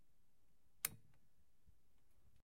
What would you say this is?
Lamp Switch Small 2
Small lamp switch turning on.